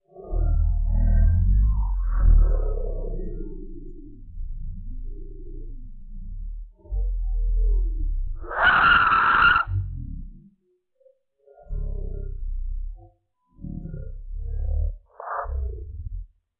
Bass Scream
I said a sentence into the mic and made its tempo and pitch very slow and low. You could probably use the part of it that sounds like a screech(which was literally me pronouncing "ssuck")for some kind of video game monster like scp 096 (hence the scp tag).
monster, scp, terrifying, creepy, weird